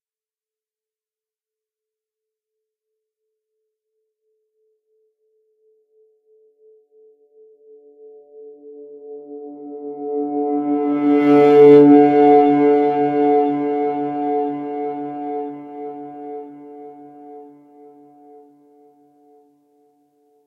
I recorded a viola for a theatre project. I recorded it in a dry room, close mic with a Neumann TLM103. Some samples are just noises of the bow on the C or D string, then once in a while creating overtones, other samples contain some processing with Echoboy by SoundToys. Amazing plugins they make!